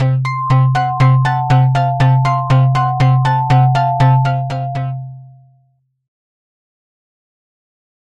an cartoonist sound of a clock.made in ableton